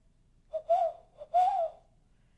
I made this recording with my hands, no birds where involved in this recording.
spring, whipperwhil, birdcall, bird, nature, forest, morning, birds, birdsong